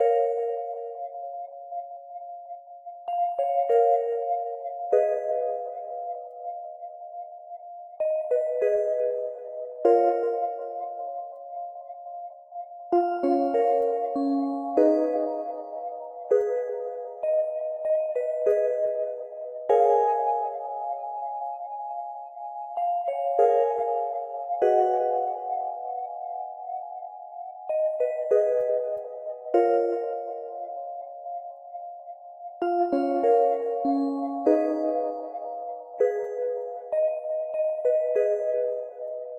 A sweet sounding Synth Piano - Light shaped Sine wave with a little reverb and delay effect ... Played in ---- . Created in Samplitude Music Studio with the Absynth 5 Synth and some internal effects. It´s an element of a glitchy Drum n Bass Track but quietly useful for other things like strethcing or backwards ...
Shape, Absynth, Ambience, wave, processed, Synthesis, Sine